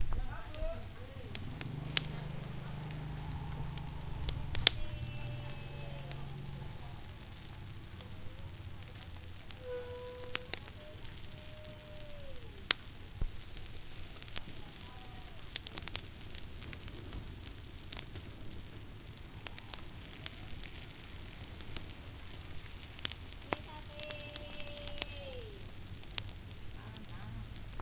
tembi fire and rain
garbage incineration day in Tembi, during the rain.
Recorded using H4 Zoom internal mic.
burning, fire, garbage, rain